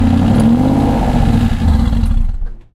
Aston growl all
automobile, car, engine, ignition, sports, vehicle